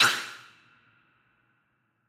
microphone + VST plugins